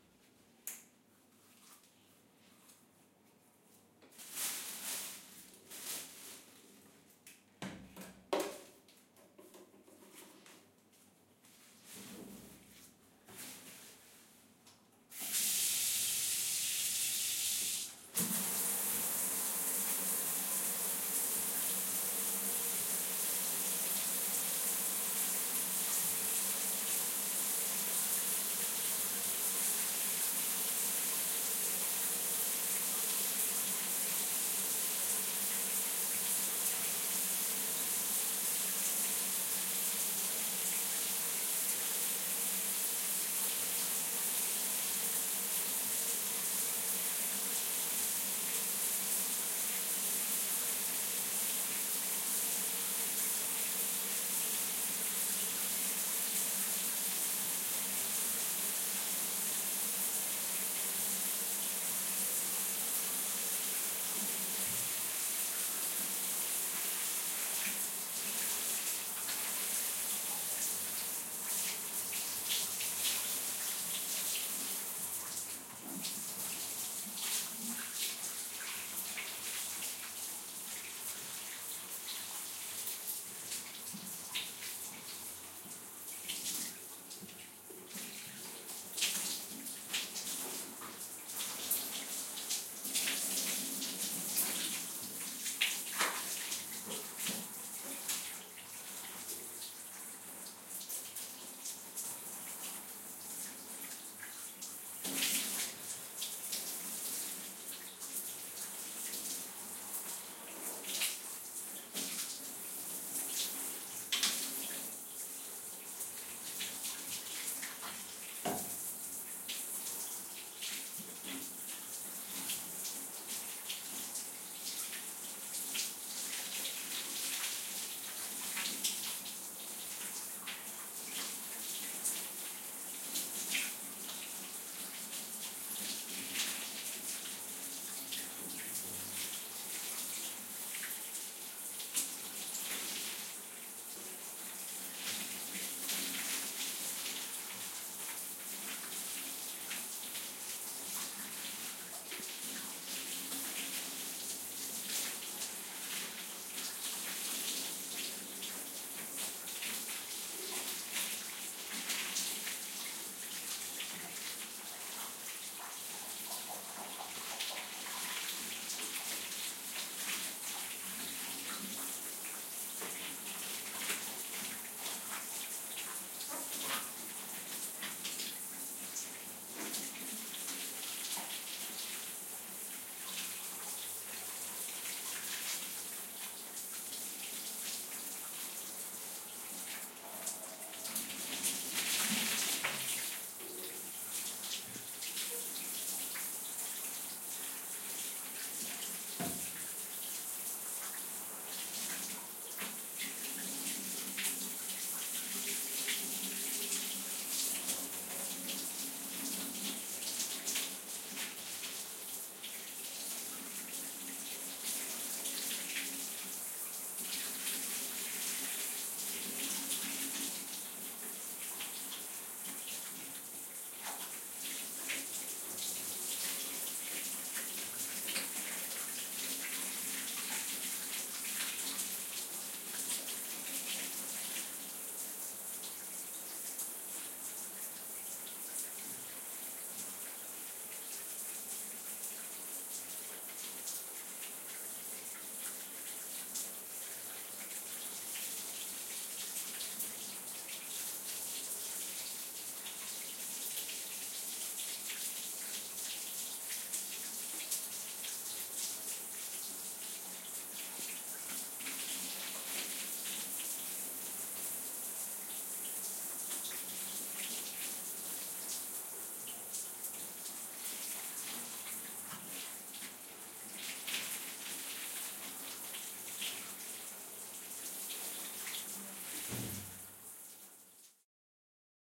ducha larga
bathroom, shower, water